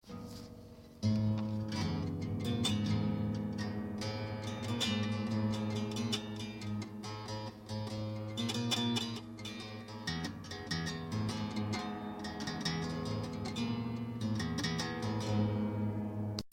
Guitar Strings (1)
acoustics, Guitar, Strings